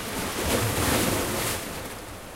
Wave Mallorca 15 IBSP2
16 selections from field recordings of waves captured on Mallorca March 2013.
Recorded with the built-in mics on a zoom h4n.
post processed for ideal results.
athmosphere, field, field-recording, mallorca, mediterranean, nature, recording, water, waves